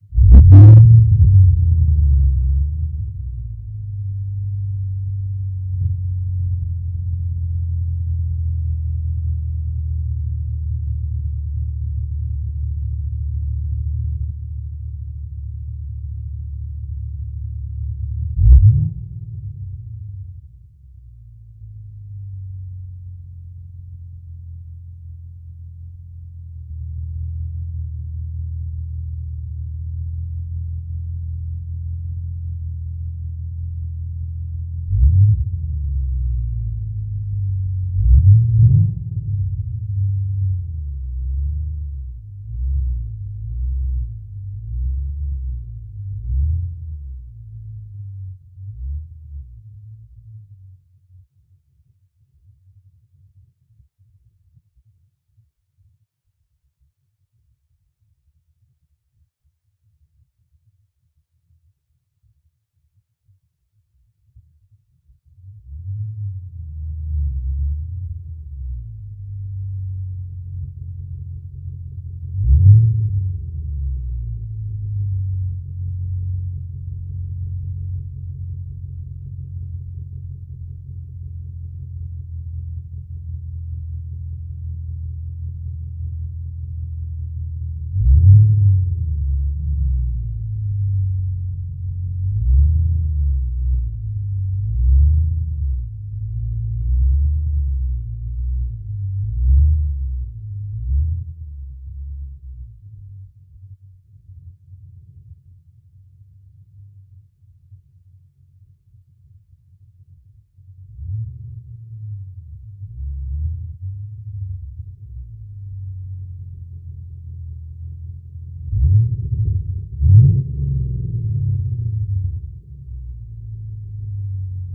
508 Horse power Chevy Camero random engine revs pulls in and parks revs again.
Idle, parking, Engine, Rev